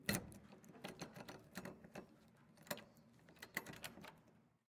Key Unlocking Door Struggling
Key being put into lock and unlocking door
door-handle, door-unlocking, key, lock, locked-door, open, unlock, unlocking-door